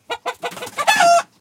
hen clucking. PCM M10 internal mics, recorded near Utiaca, Gran Canaria
chicken, clucking, field-recording, hen, henhouse